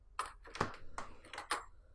Heavy metal outer door opening